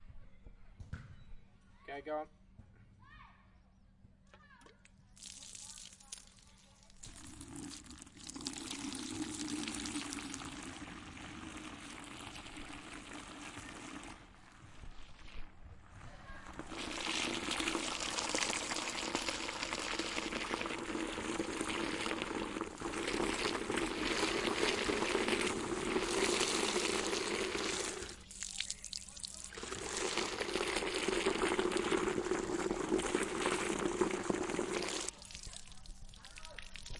Recording of me filling a bucket, at the start the bucket is empty and you can hear it get more sloshy as it fills.
water, pour, pouring, splash, Bucket, filling